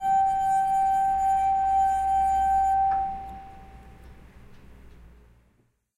Singing Glass 1
Running my finger around the rim of a glass